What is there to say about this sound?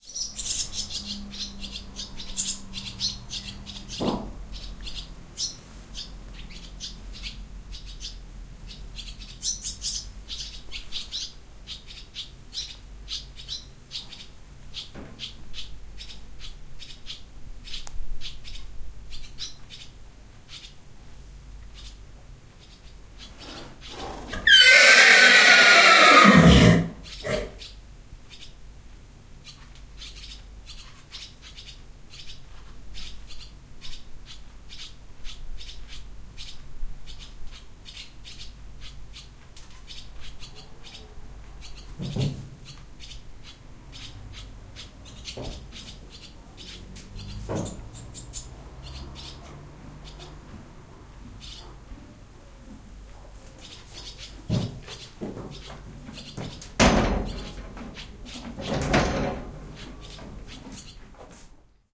recorded with a olympus LS-11 in a barn on a farm. you can hear a horse nicker and swallows